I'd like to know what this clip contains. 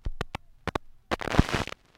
Short clicks, pops, and surface hiss all recorded from the same LP record.
glitch, analog